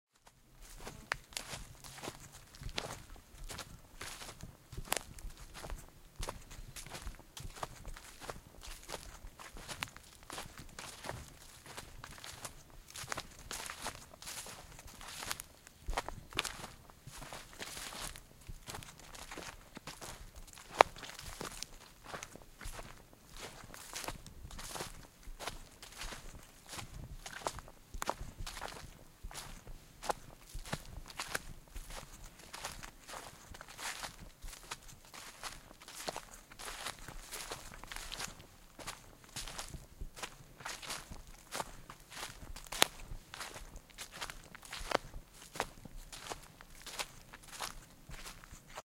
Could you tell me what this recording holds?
Walking in forest
Recorded in Northern Jutland in a small forest near Jenle Museum. I used a Sm-7b, Zoom h5 and Skylifter.
Use it for whatever you like :)
forest, footsteps